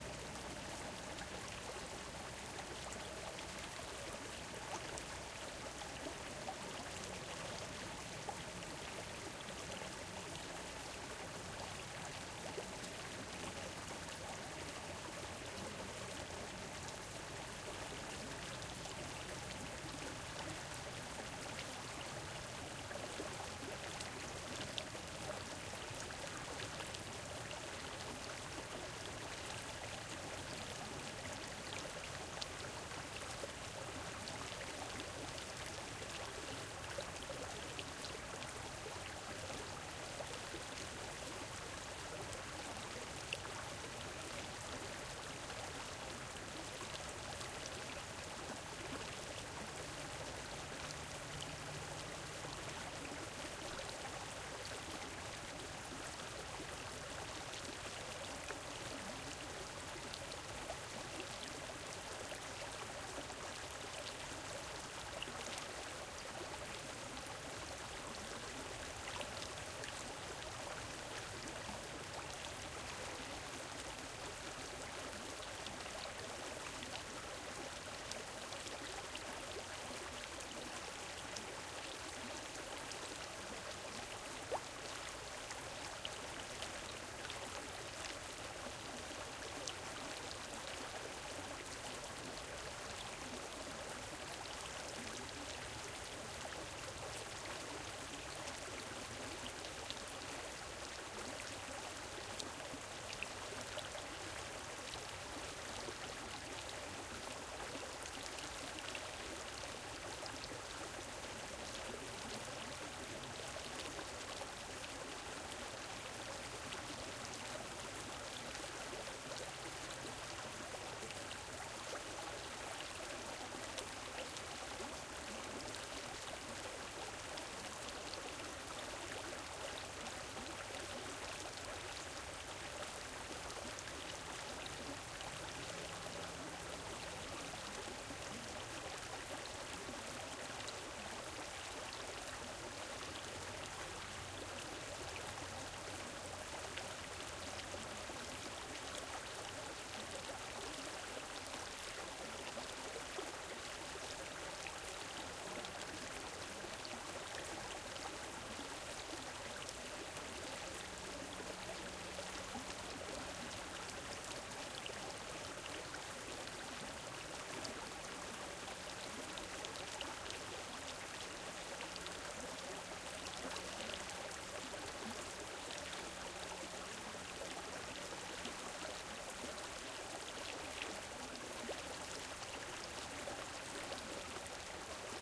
Burbling Brook
A nice, clean, relaxing clip of running water, recorded at dusk in the Seattle area.
Recording the clip was not as relaxing as listening to it. I had to stoop down very uncomfortably for nine minutes until I got three minutes with no airplanes, and then I had to walk back to my aunt's house in the dark without a flashlight, though woods full of large spiders... I hope you'll agree It was totally worth it!
2 Primo EM172 Capsules -> Zoom H1
babbling, brook, creek, EM172, flow, flowing, gurgle, H1, liquid, nature, outdoor, Primo, relaxing, river, stream, water, Zoom